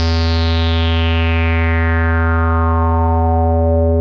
Multisamples created with Subsynth.
multisample, square, synth